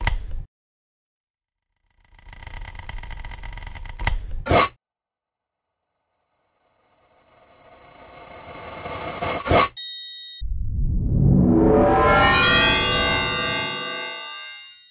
tts examples
Formatted for use in the Make Noise Morphagene.
Here is a Reel with a few examples of transient sounds followed by TTS recordings.
and is not intended for use in creating that effect. See the other sound(s) in this pack for Reels that are intended to be used in creating Time Travel Simulations.
mgreel, morphagene, time-travel-simulation, tts